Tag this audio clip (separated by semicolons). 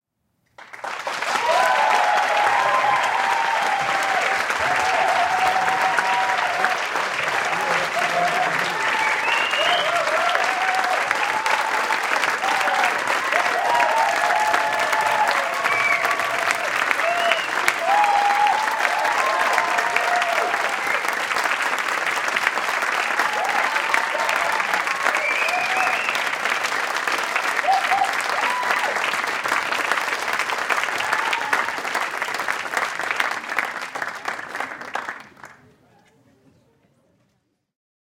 applause; audience; cheering